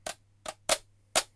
The sound of a Stylophone stylus being scraped across a plastic Stylophone speaker grill.
grill, noise, plastic, rough, scrape, scraping